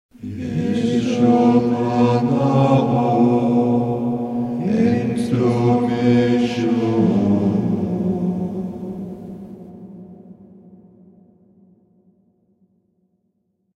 Cathedral monk chant gibberish by DST.
Sounds like an ancient language.